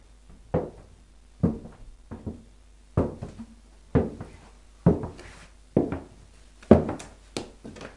walking in healed boots
boots, healed, walking